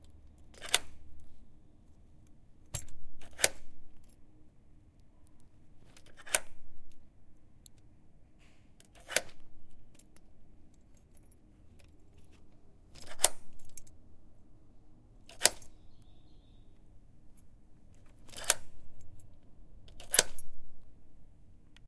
lock, open, close 1
Sound of locking and unlocking door.
close, lock, latch, unlock, open